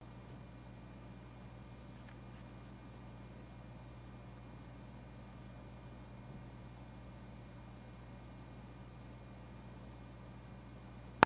Busy Computer 2
Quieter version. Recorded with a black digital IC Sony voice recorder.
working, computer, machine, office, machinery, humming